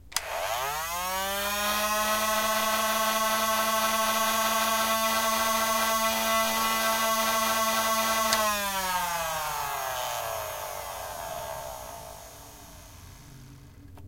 A Nerf Stryfe being revved up, held there for a few seconds, and revved down. Sounds a bit like a siren, with some wobble in the middle. Slow it down by half or more for a decent alarm sound.